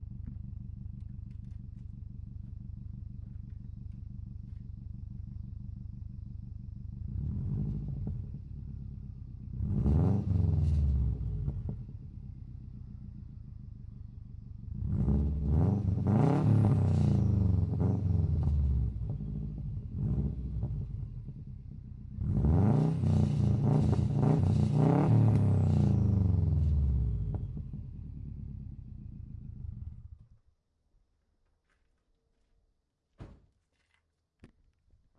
WRX - Exhaust sounds
This is a clip of various engine sounds recorded on my 2003 Subaru Impreza WRX with a 3" turbo-back exhaust system. The audio starts with the engine at idle and includes a few engine revs at different RPMs. You can hear some turbo whistling and also some rattling of god-knows-what parts. It's a little muffled (kind of a muddy sound), but could be used as part of an action sequence or with a music track laid over.
As always, I love knowing how people use my tracks! I'd appreciate a comment letting me know what kind of project you're doing and, if possible, a link to any finished work! Thanks.
boxer, car, cat-back, engine, exhaust, growl, idle, impreza, motor, rally, rev, revving, rumble, spool, straight-pipes, subaru, suby, tbe, turbo, turbo-back, wrx